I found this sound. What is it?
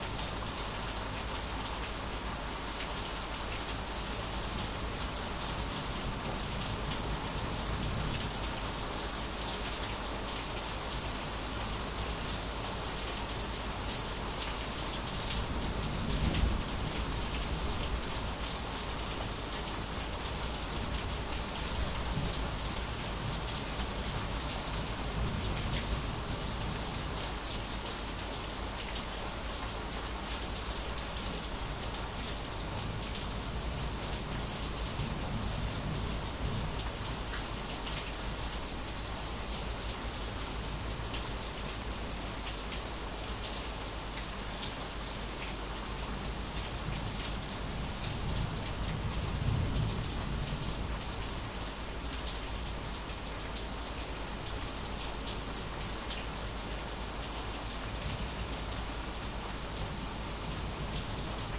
Heavy rain outside my room
A storm outside recorded with my Behringer C1.You hear the rain dripping off of the balcony.